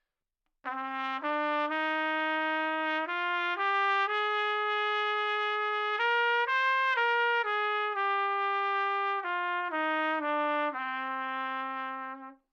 Trumpet - B natural minor - bad-tempo
Part of the Good-sounds dataset of monophonic instrumental sounds.
instrument::trumpet
note::B
good-sounds-id::7397
mode::natural minor
Intentionally played as an example of bad-tempo